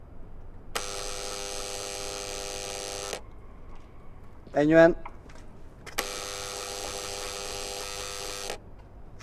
door buzzzzer
Door buzzer.. as simple as that. Recorded outdoor with a zoom H4 and a sennheizer long gun microphone.
door, environmental-sounds-research